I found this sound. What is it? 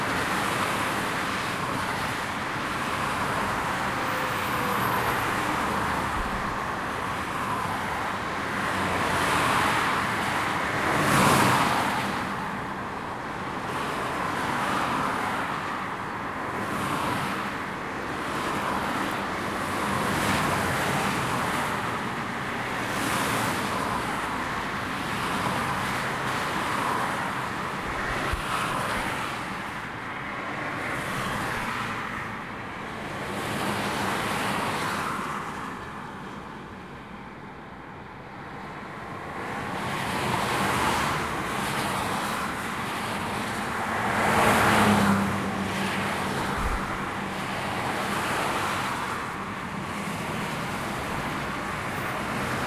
cars passing on busy avenue